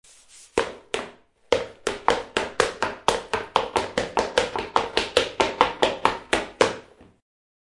Recording of shoes who run on a stone floor. Recording with zoom.